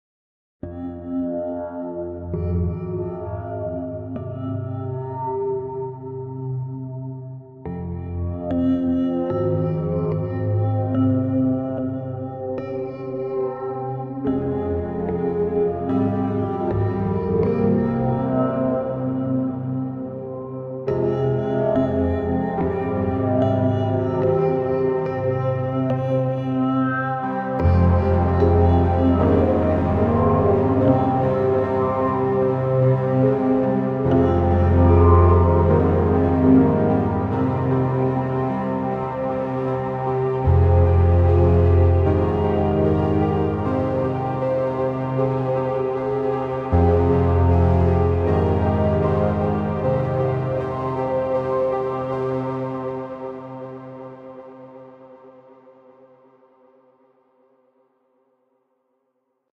Free soundtrack for using with Zombies/apocalypse type of movies.
movie, zombies, abandon, Thriller, soundtrack, apocalypse